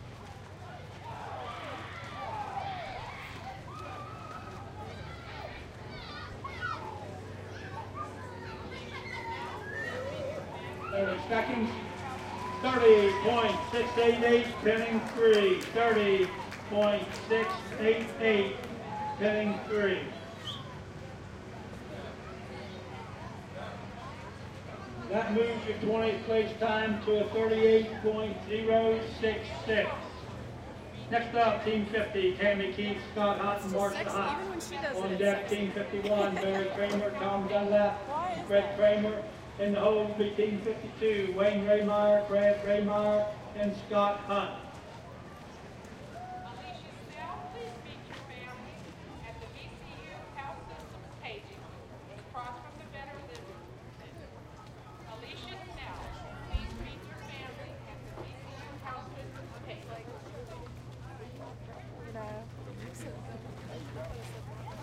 Announcer at a rodeo competition, ending with nice announcement for lady to please meet her family at the VCU tent.